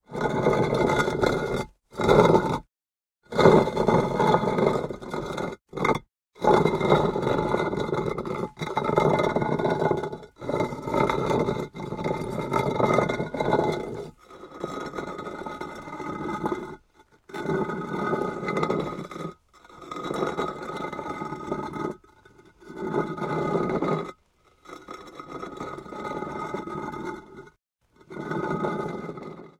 stone tile dragging friction scrape m10
Scraping heavy stone tiles against each other. Recorded with Sony PCM m10
heavy, scrape